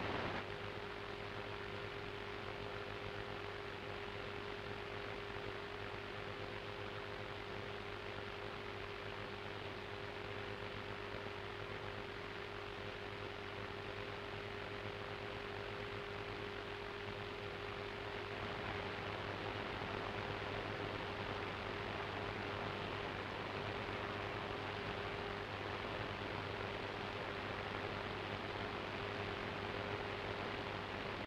Radio Static Short Wave quiet 2
Some radio static, may be useful to someone, somewhere :) Recording chain Sangean ATS-808 - Edirol R09HR
noise, radio-static, short-wave, tuning